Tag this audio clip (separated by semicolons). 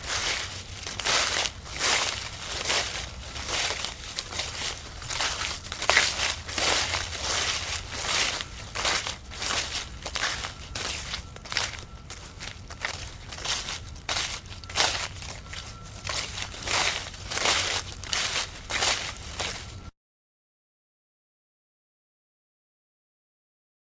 fall; field-recording